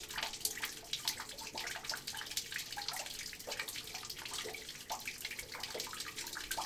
The sound of rain running down a storm drain in a California light drizzle. The microphone was placed very close to the drain and the sound is very dense with a few reverberating lows and some high pitched splashes.